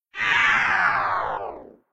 A UFO landing.